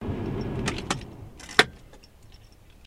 Turning off engine & removing the car key
key, remove